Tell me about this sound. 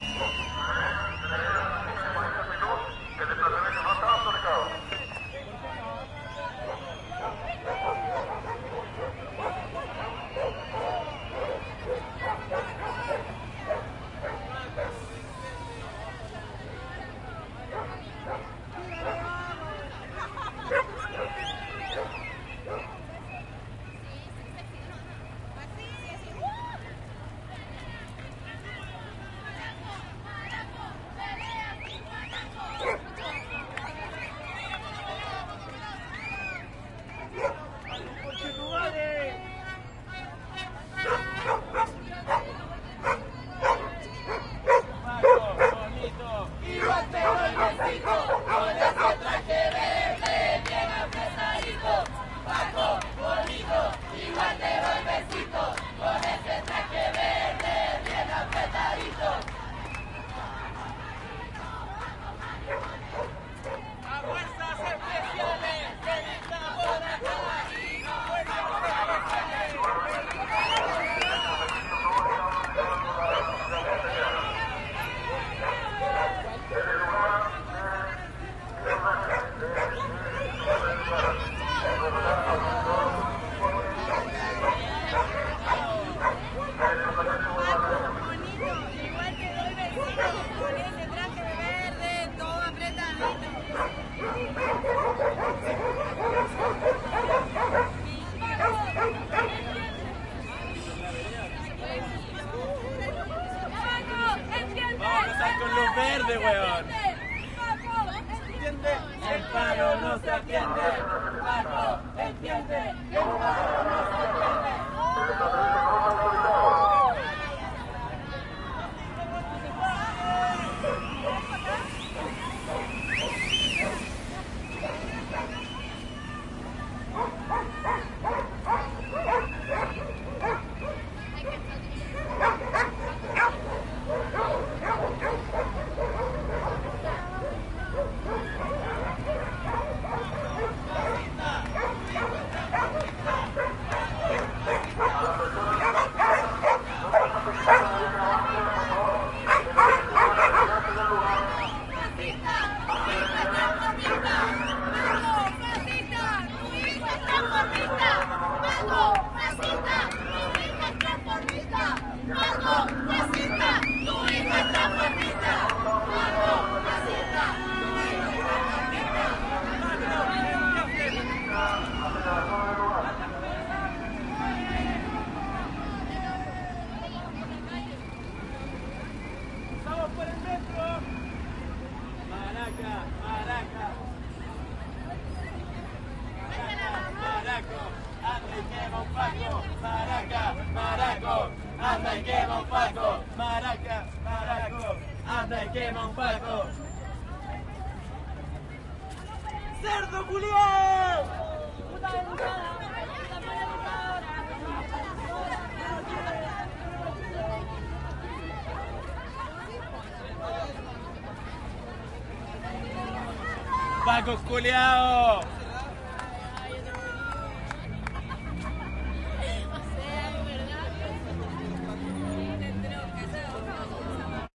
marcha de las putas y maracas 15 - marcha reprimida
Carabineros prohibe el paso con efectivos y alertando con un megafono, y unos perros los encaran. Varios gritos en contra de la fuerza policial. En paro no se atiende. Algo de tráfico.
pacos, leonor, putas, cops, santiago, gritos, protesta, protest, carabineros, maracas, street, calle, silvestri, marcha, chile, crowd